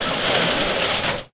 Shop closing its shutter. Loud rusty metal sound. Stereo mike - Sound Devices 722